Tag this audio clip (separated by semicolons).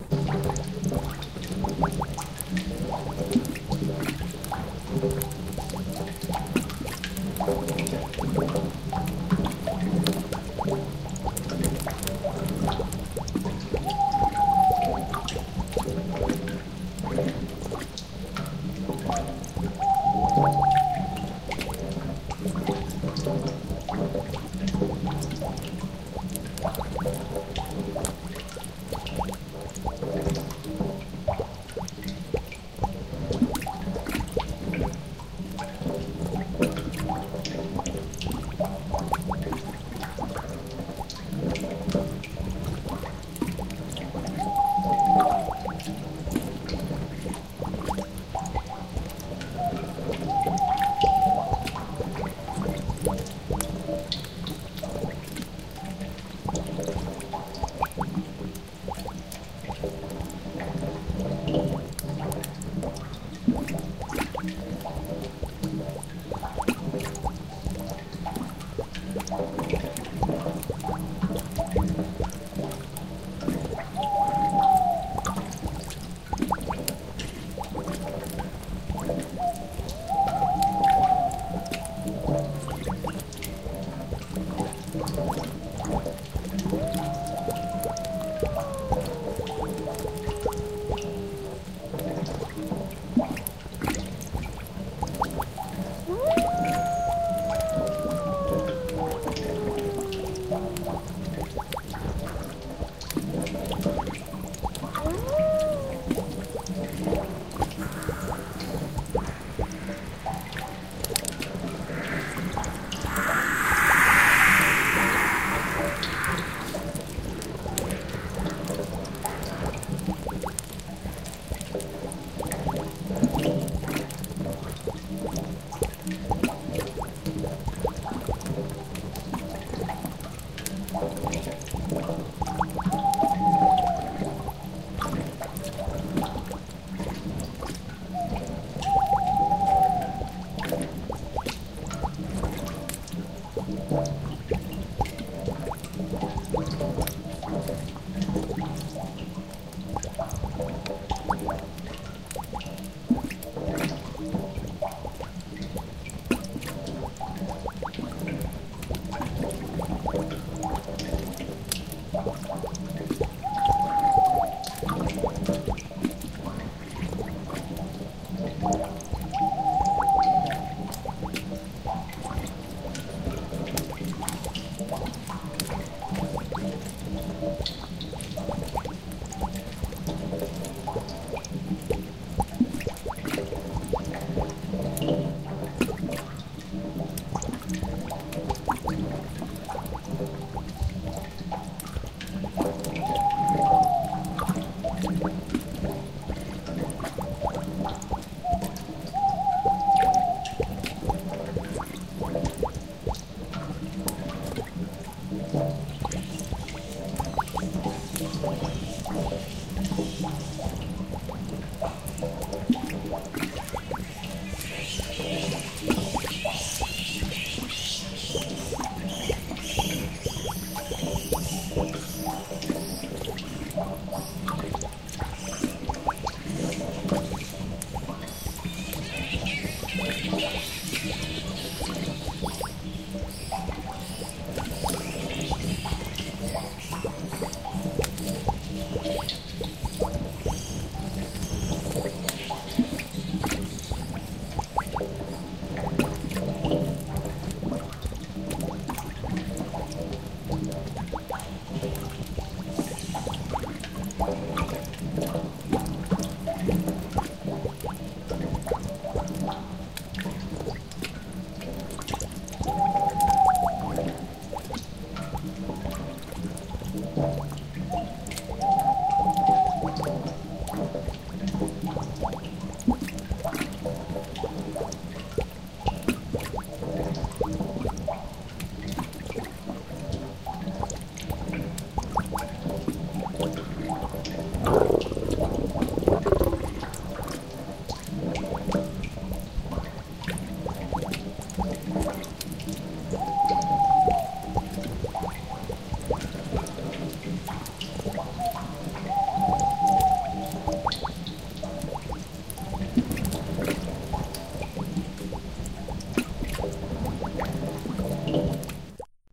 bats; owl; cave; cauldron